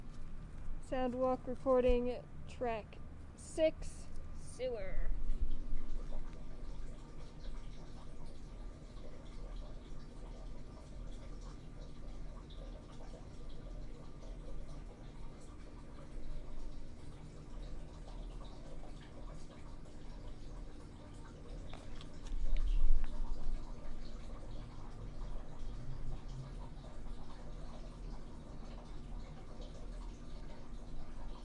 Sound Walk - Sewer:Drain
Water flowing through sewer
drain, sewer, water